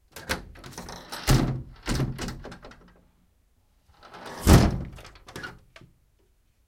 opening closing the window
Sound of opening and closing the window with plastic frame. Recorded with Zoom H1 internal mic.
window, close, open